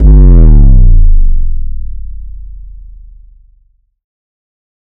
Bass Drop
sub, DROP, BASS